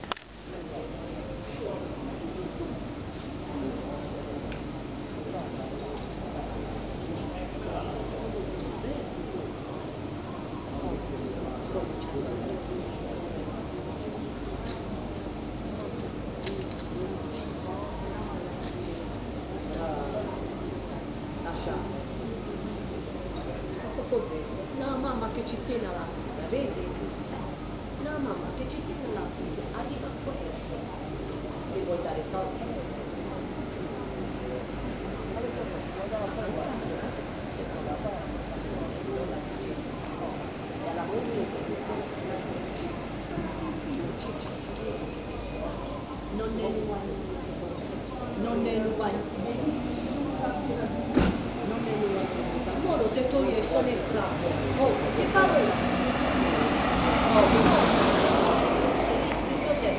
ambience in bars, restaurants and cafés in Puglia, Southern Italy. recorded on a Canon SX110, Bari